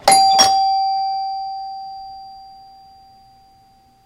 Door Bell 01
bell, doors